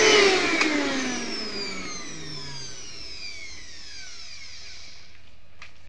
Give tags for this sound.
household noise vacuum

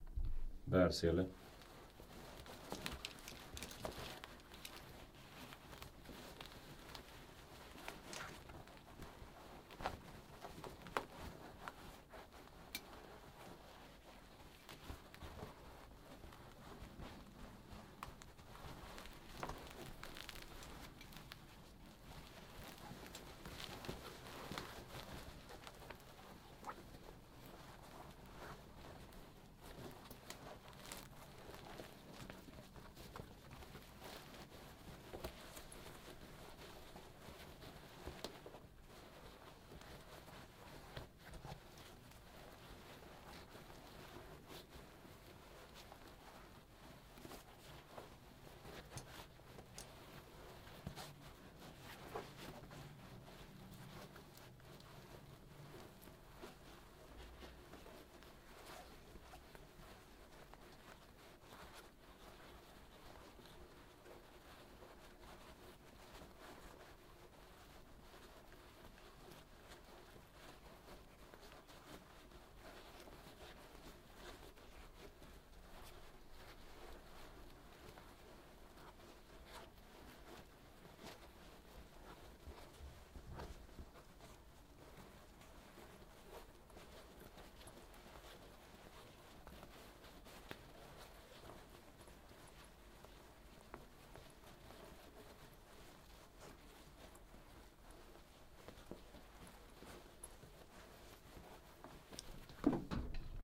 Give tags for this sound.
canvas fabric movement moving nylon rough rustle rustling soldier uniform

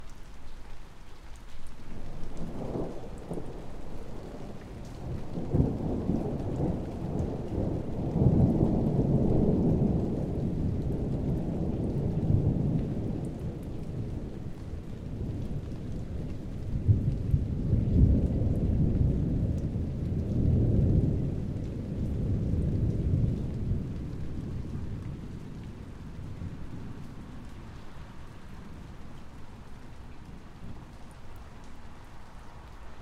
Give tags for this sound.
Lightening Thunder

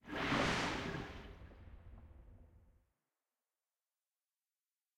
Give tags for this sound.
swoosh swish movement underwater bubbles submarine

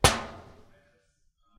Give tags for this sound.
arcade armor game hit sfx

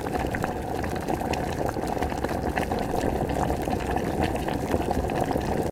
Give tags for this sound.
water boiling

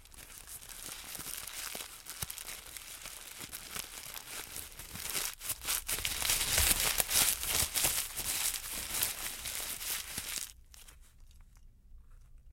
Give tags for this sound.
embrulho Papel-ama abrindo-presente gift newspaper ado

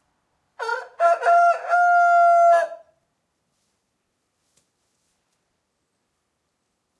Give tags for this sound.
barn call rooster

